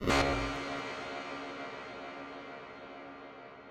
stret98ch
Time stretched saw wave with time stretched reverb and time stretched delay. Thats the result.